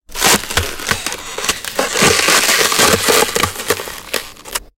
Ice 3
Derived From a Wildtrack whilst recording some ambiences
winter, cold, footstep, step, frozen, foot, field-recording, freeze, crack, snow, walk, sound, frost, BREAK, effect, ice